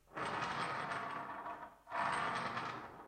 rolling nail on wood